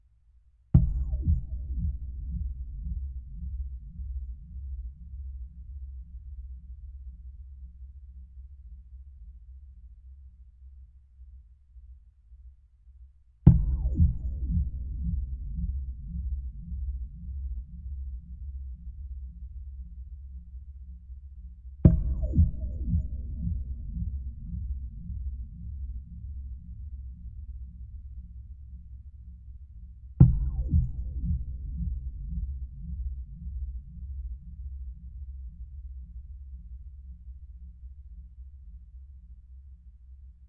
Wire-tapping
A stereo contact-microphone-recording of hitting a wire with a stick. The mics are mounted about two meters apart on a 50 meter steel-wire hanging over a marsh in the forest. The recording has some coarse compression, but otherwise uneditet/processed. Beware of high bass!